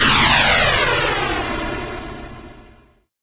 rolling rocket
army, artillery, bomb, boom, destruction, explosion, explosive, game, games, military, video, war